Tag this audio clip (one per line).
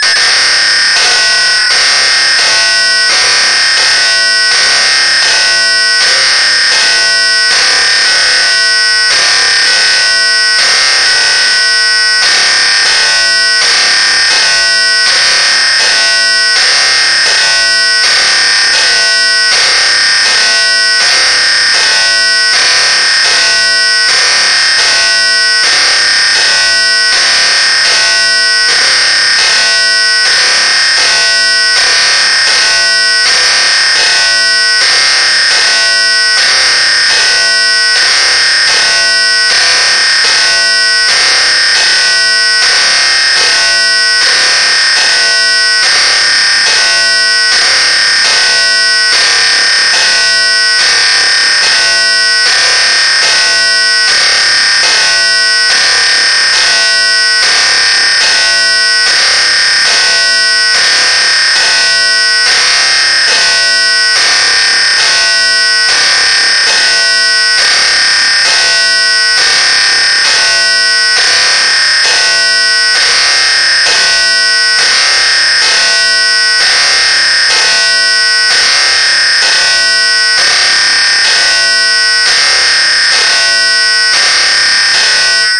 creepy
dissonance
psycho